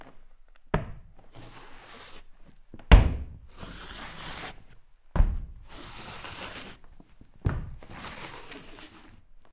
Footstep Drag Indoors
The sound of a person indoors dragging on foot across the floor while stepping with the other foot.
dragging, foot, walking, drag, limping, footsteps, hardwood, floor, steps, limp, footstep, indoors